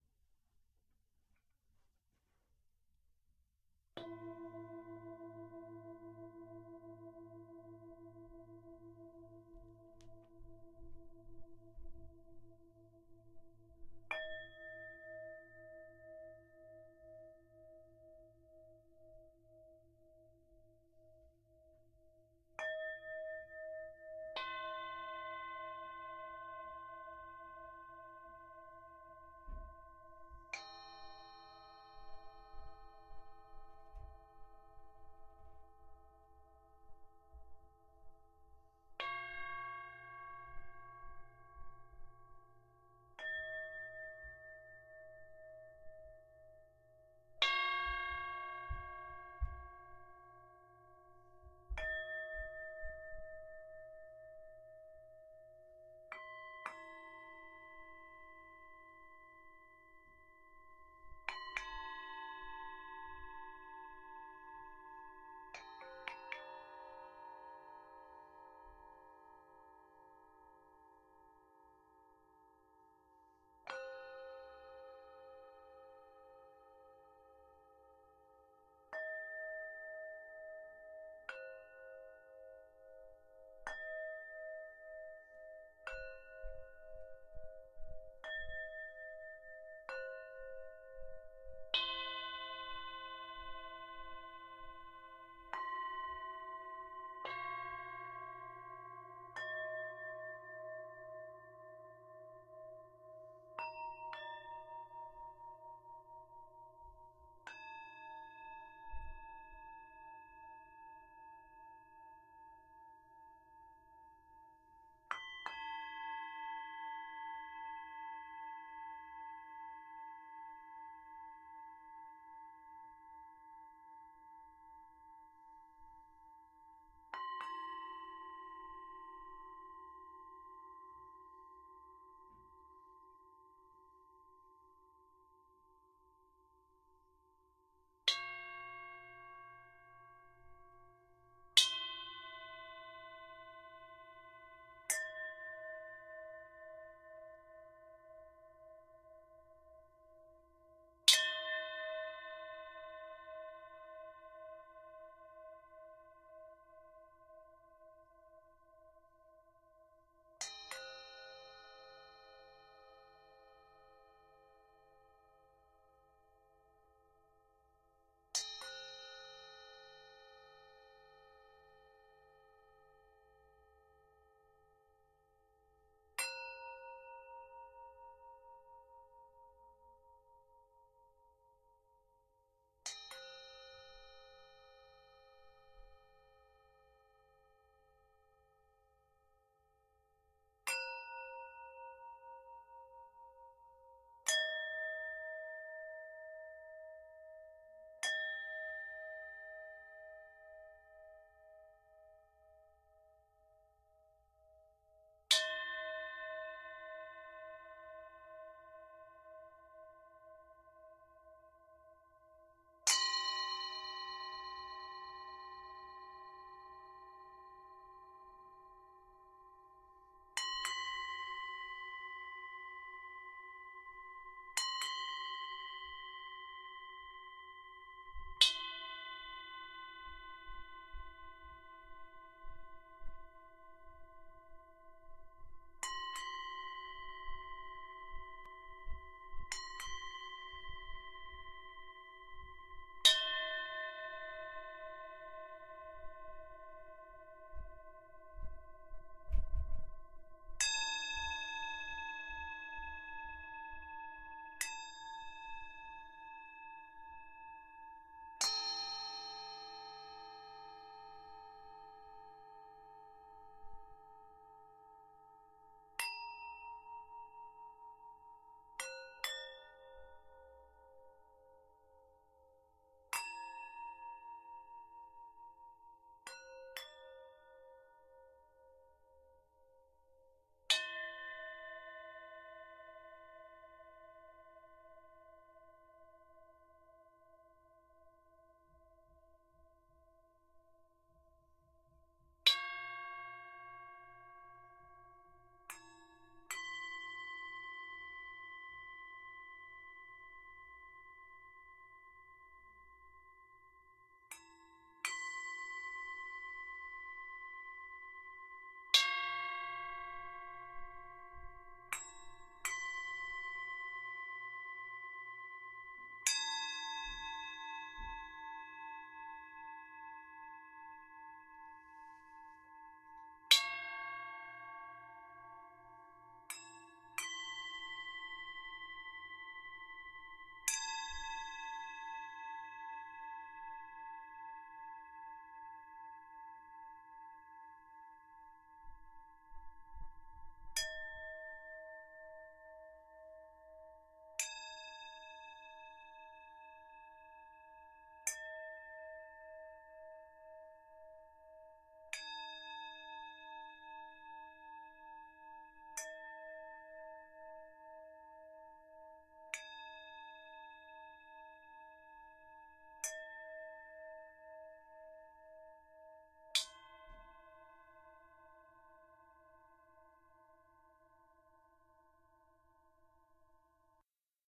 mixed bowls all
bowls, singing, overtones, ambient, Tibet, Tibetan